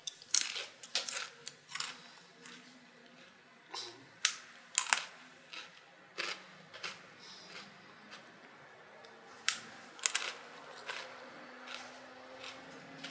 snap snapping breadsticks grissini crunch munch crack eating
eating, munch, crack, crunch, snap, breadsticks, grissini, snapping